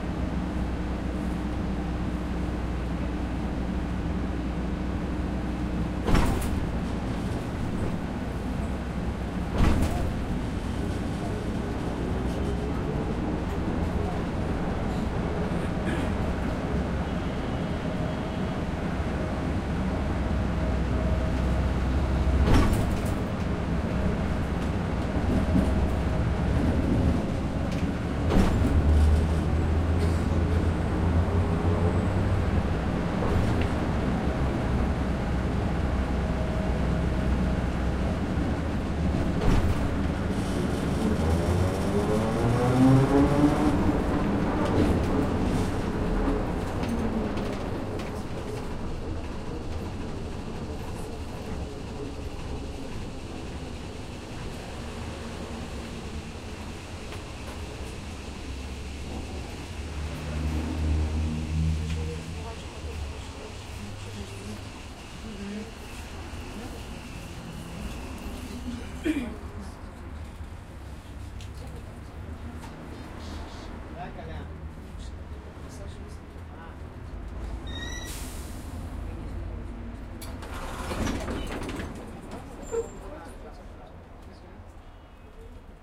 I riding in the bus and suddenly ended electricity.
Recorded: 2010-10-21.